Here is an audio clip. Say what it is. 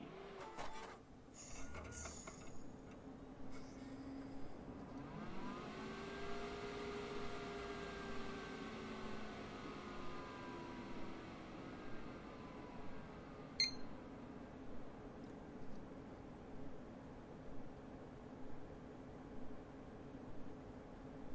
Computer Start Up
Starting up of a desktop computer
Fan, Gadget, CPU, power-on, Computer, Technic, Peep, power, startup